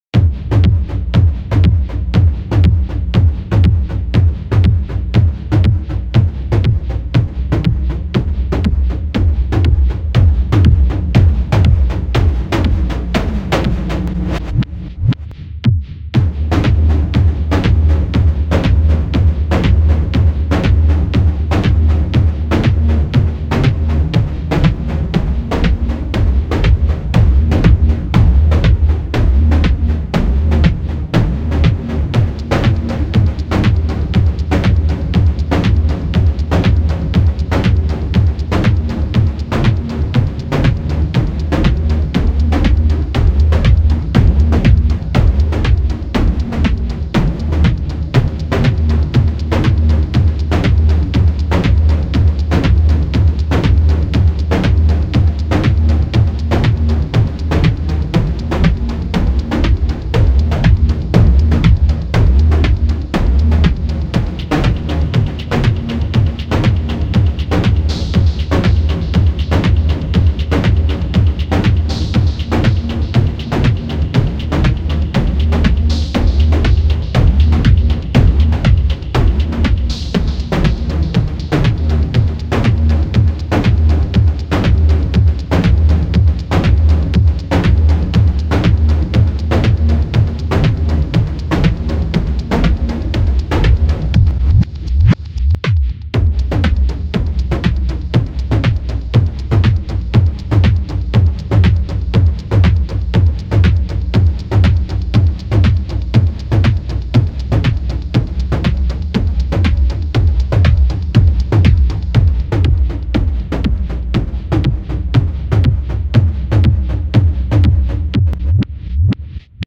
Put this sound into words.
Drum and Bass Techno .
Synths:Ableton live,Silenth1.
Closed, Loop, EDM, Electric-Dance-Music, Hi-Hats, Ride, Drum, Kick, originaltrack, Open, Clap, Bass, Snare, Stab, Techno, Synthesizer, House, Drums